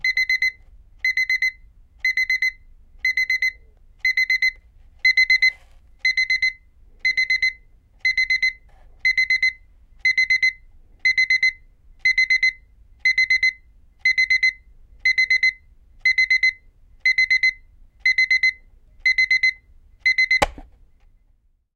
Piipittävä elektroninen herätyskello. Herätys, pitkä piipitys, kello sammutetaan nupista. (Philips).
Paikka/Place: Suomi / Finland / Vantaa, Hiekkaharju
Aika/Date: 25.09 1985